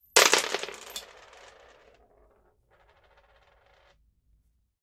coin drop wood floor multiple coins
pennies falling onto a wooden floor
floor, drop, coin, wood